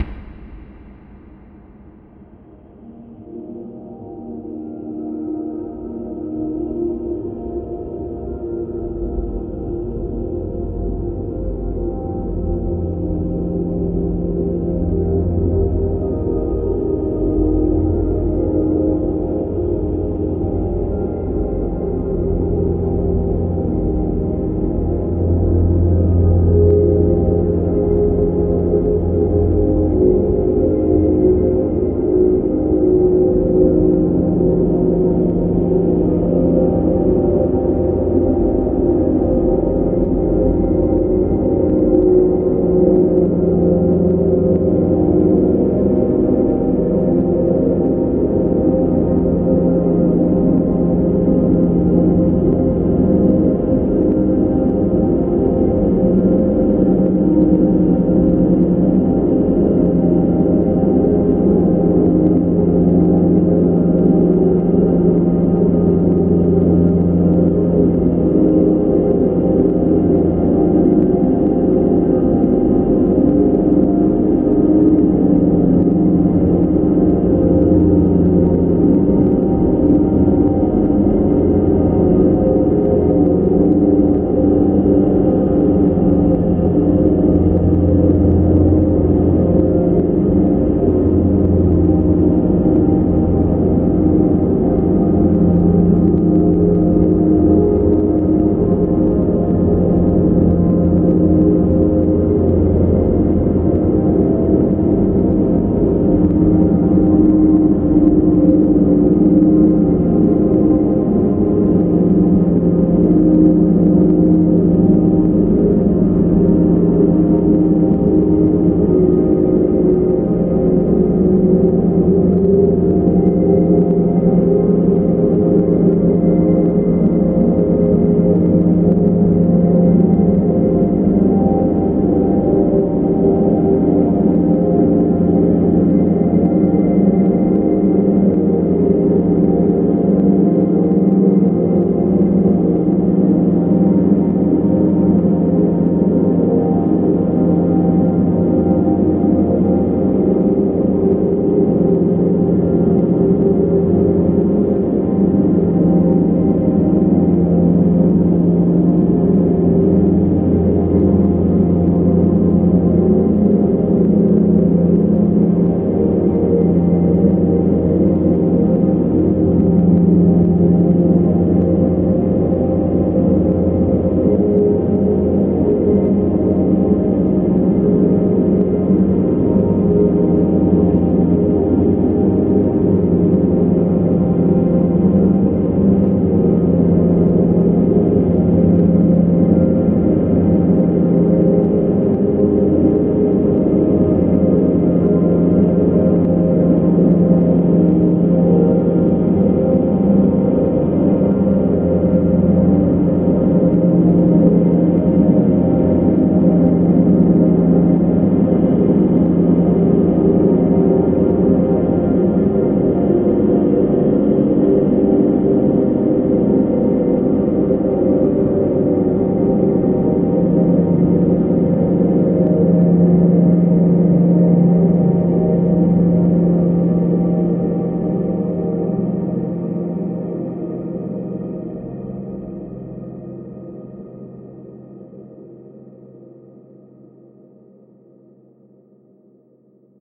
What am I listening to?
LAYERS 011 - The Gates of Heaven-40
LAYERS 011 - The Gates of Heaven is an extensive multisample package containing 128 samples. The numbers are equivalent to chromatic key assignment. This is my most extended multisample till today covering a complete MIDI keyboard (128 keys). The sound of The Gates of Heaven is already in the name: a long (exactly 4 minutes!) slowly evolving dreamy ambient drone pad with a lot of subtle movement and overtones suitable for lovely background atmospheres that can be played as a PAD sound in your favourite sampler. At the end of each sample the lower frequency range diminishes. Think Steve Roach or Vidna Obmana and you know what this multisample sounds like. It was created using NI Kontakt 4 within Cubase 5 and a lot of convolution (Voxengo's Pristine Space is my favourite) as well as some reverb from u-he: Uhbik-A. To maximise the sound excellent mastering plugins were used from Roger Nichols: Finis & D4. And above all: enjoy!
ambient, artificial, divine, dreamy, drone, evolving, multisample, pad, smooth, soundscape